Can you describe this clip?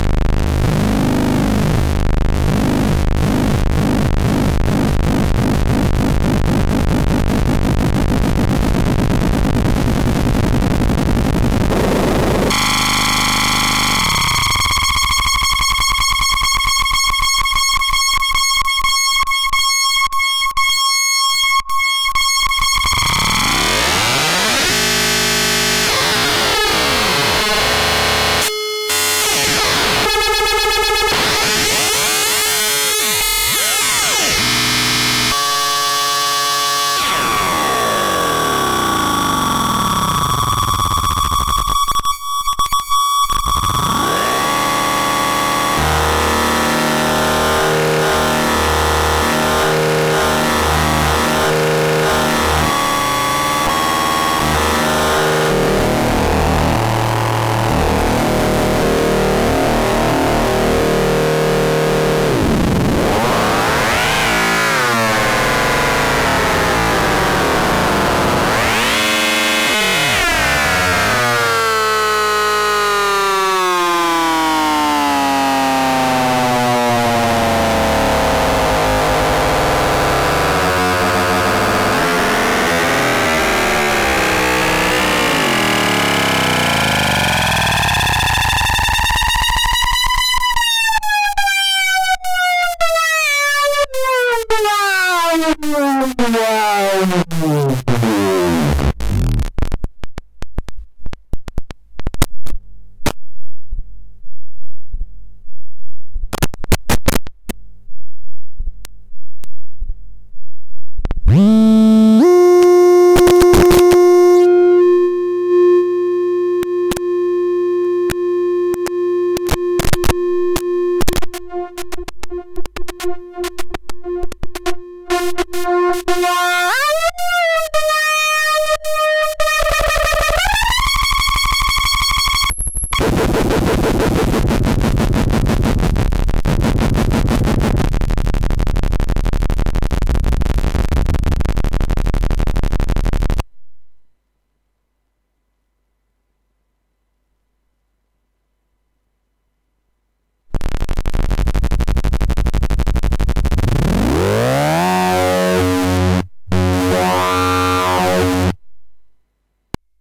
Its a sine wave folded 4 times by a CGS inspired wavefolder module (kinda like the metalizer from the minibrute). The whole thing is then modulated with an external function generator that controls amount and frequency of modulation. This is what came out and it's pure junky drone noises, so goddamn nasty. ABSOLUTELY LOVE THEM. Feel completely free to sample this and use it for your own purposes. This is a diy synthesizer I'm building and unfortunately it is still a prototype, but I'm gonna finish it soon and hope to sell it. Cool indeed, isn't it?